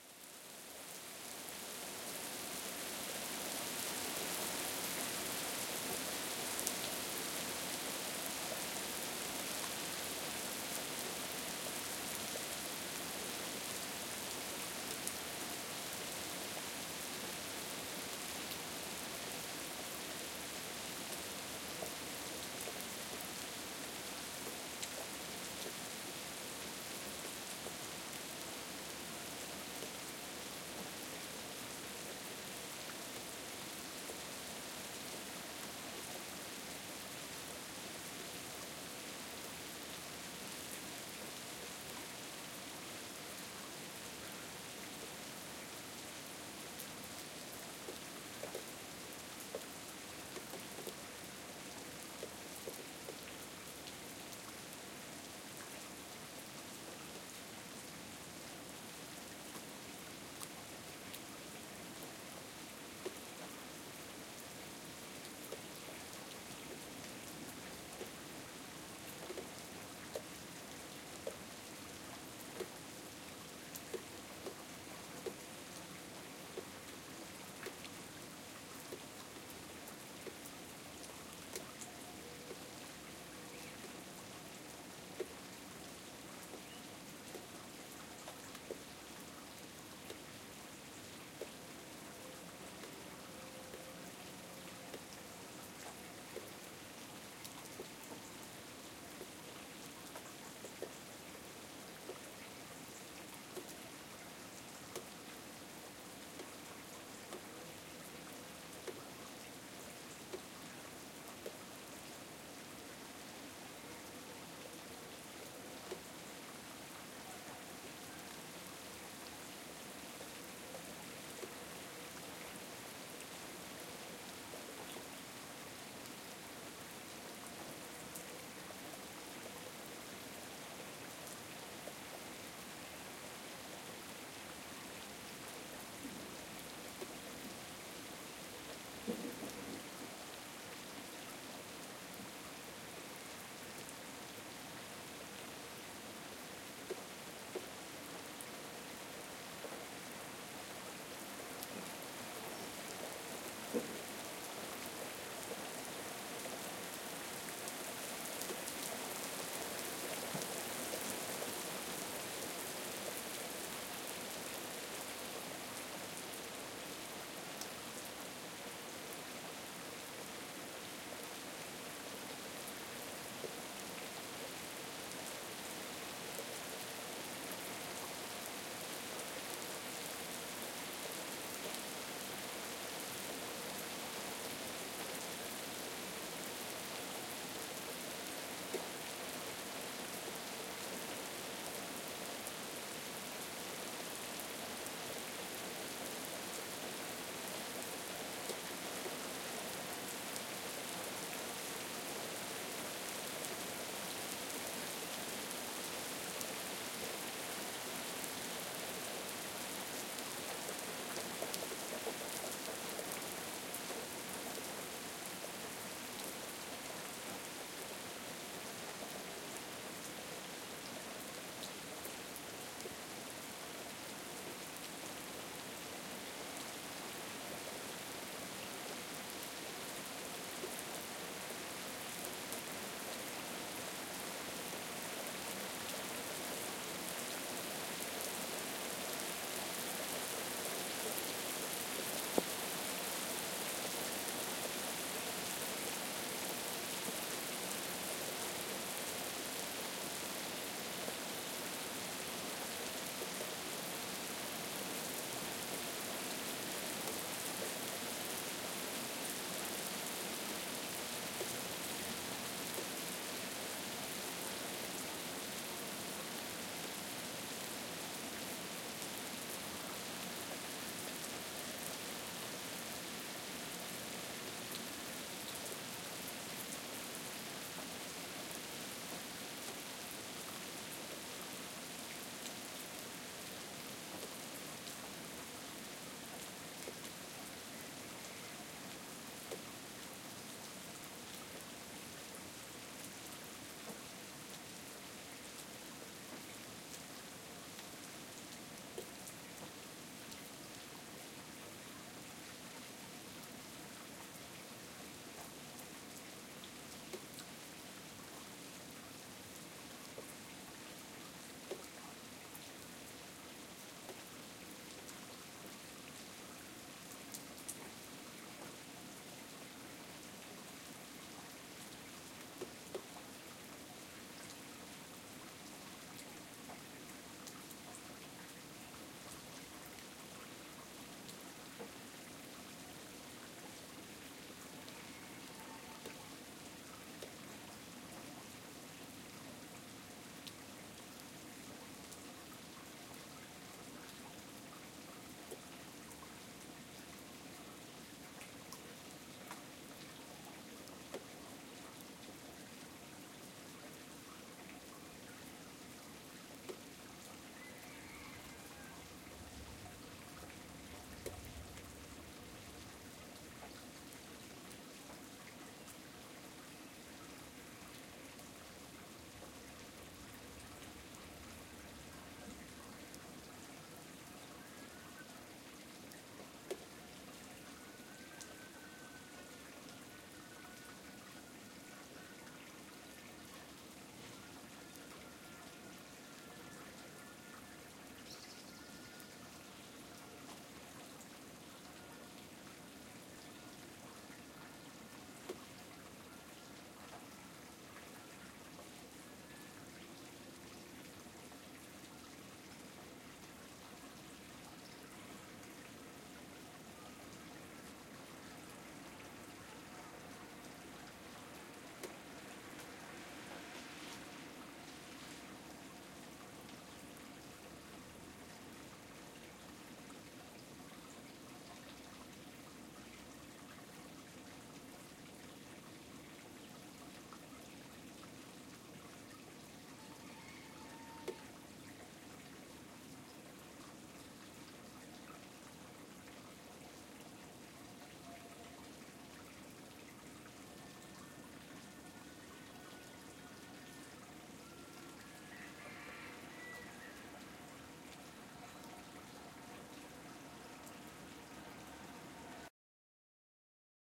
lluvia acaba pajaros gallo
ending rain... birds starts to sing... a cock
h4n X/Y